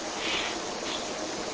6-20-2006 without filtering
faint whispering captured on a digital Sony IC Recorder in my empty bedroom. recordings follow a series of bizarre nights which my girlfriend and i experienced in our home.